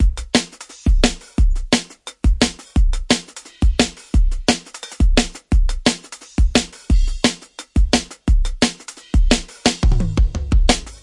inline drum with hit reverse 174
DRUM AND BASS - drumloop 172 bpm